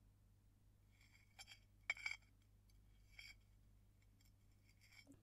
Fork On Plate
drag fork on a plate
plate, kitchen